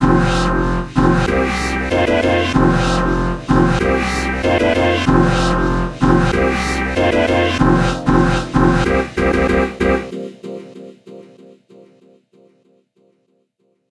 hip hop13 95PBM
background, beat, broadcast, chord, club, dance, dancing, disco, drop, hip-hop, instrumental, interlude, intro, jingle, loop, mix, move, music, part, pattern, pbm, podcast, radio, rap, sample, sound, stabs, stereo, trailer